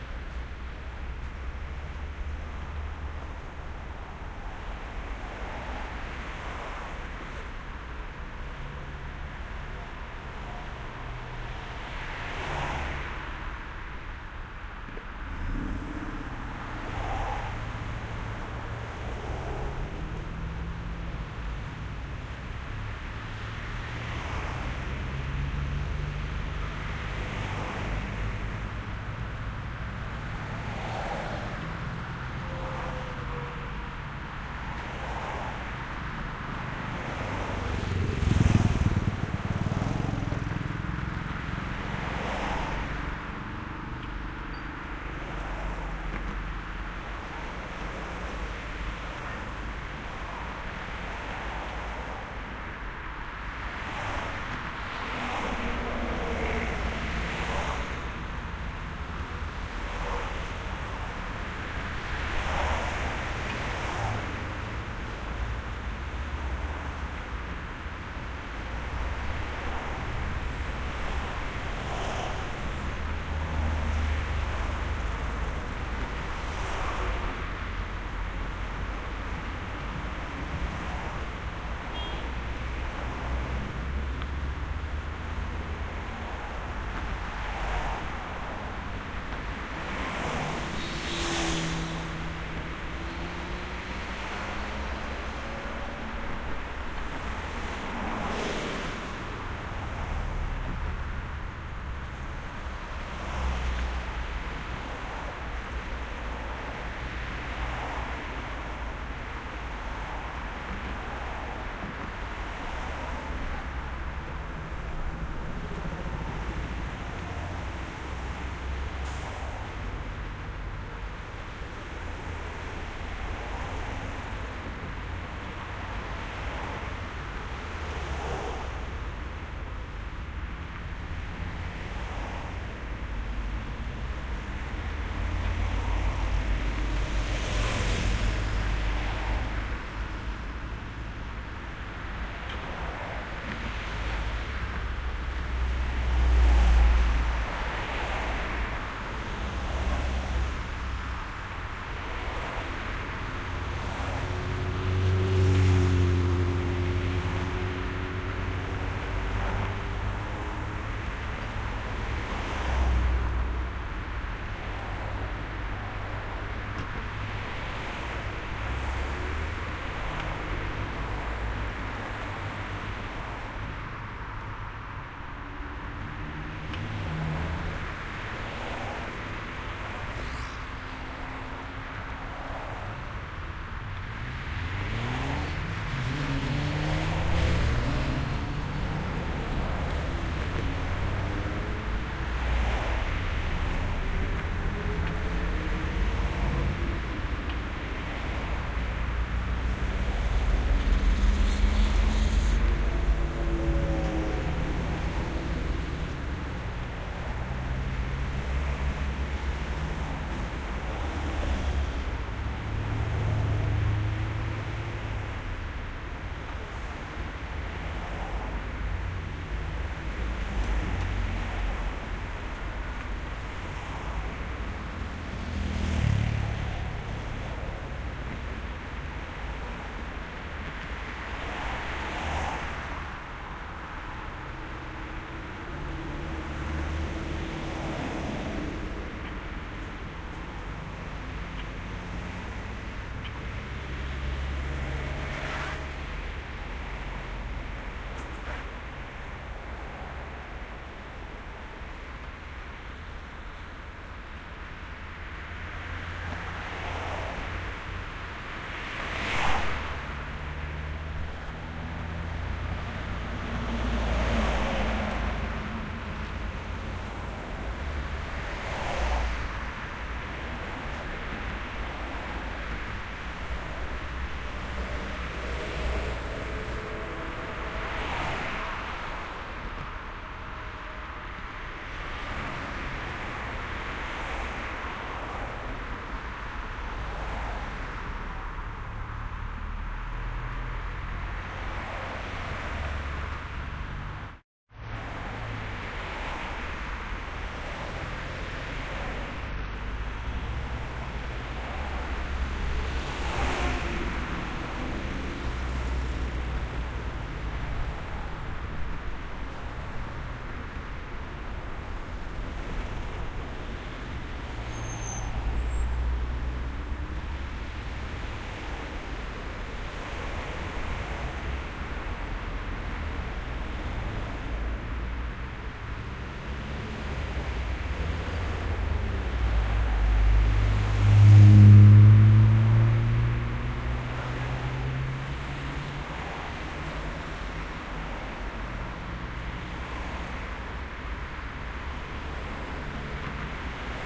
City highway crossroad v01
atmosphere at a busy city intersection
crossroads, traffic, transport